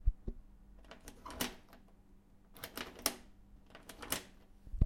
Locking the door
key, door, lock